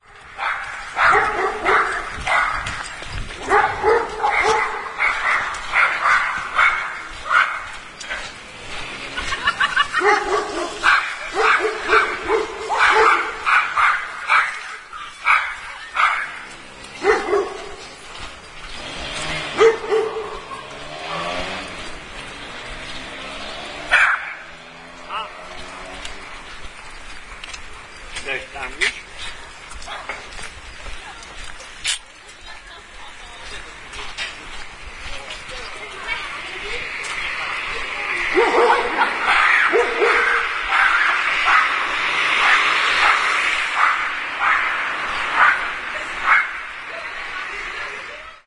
08.09.09: about 20.00; Tuesday in Sobieszów (one of the Jelenia Góra district, Lower Silesia/Poland); Cieplicka street in front of the gardening shop and so called Oteel (an old PRL work place); barking dogs, laughing teenagers.
ambience, barking, car, dogs, laughing, people, sobiesz, street, voices, w